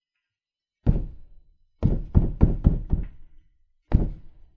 muted wall hit
Sounds of hitting the wall trhough the pillow. Recorded on Blue Yeti.
hit, muted, wall